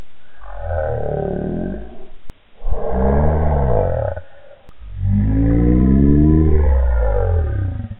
Groaning sound. Recorded With Realtek High Definition Audio Headset. Edited with Audacity.